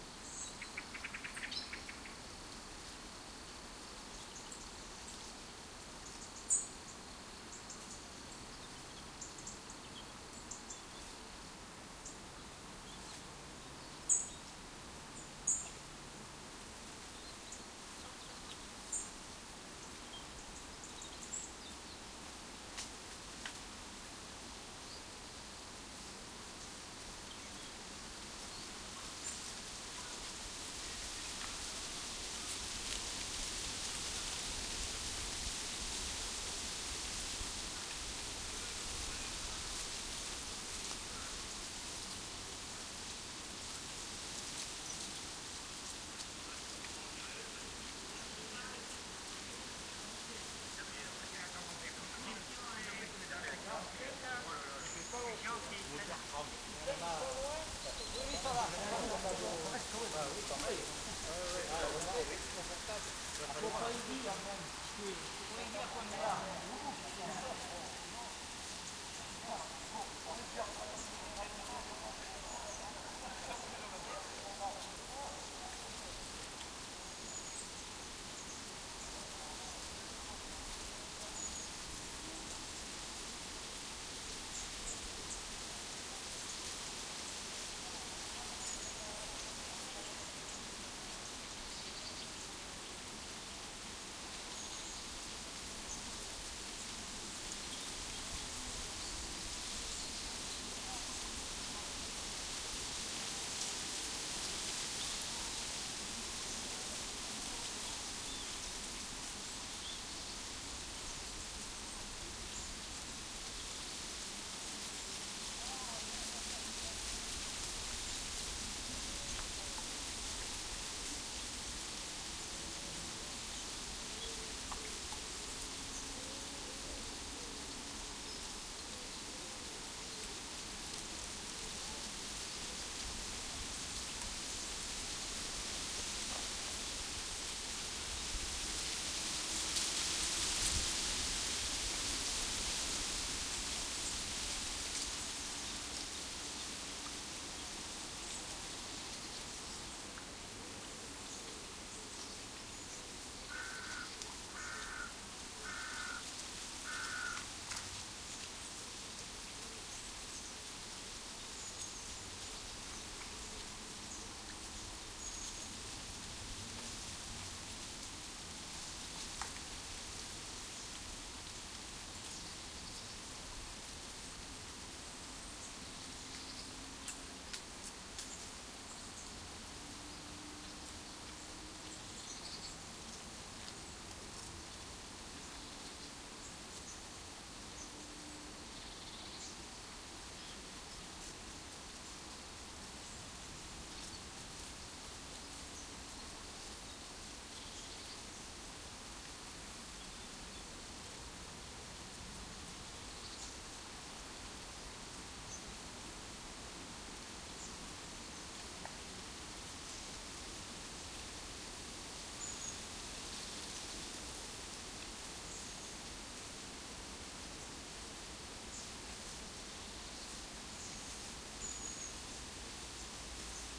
Tree Rustle Bike
Very windy day with dry leaves rustling in a tree by the river Cher, in Bruere Allichamps, France. Thick and luscious. Group of Cyclists ride by. You can hear the stereo of them passing very well. They talk to each other in French.
Microphones: 2 x DPA 4060 in Stereo